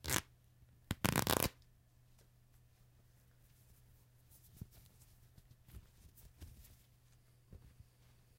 A pack of Rips and Tears recorded with a Beyer MCE 86N(C)S.
I have used these for ripping flesh sounds.
Enjoy!
tear, flesh, rip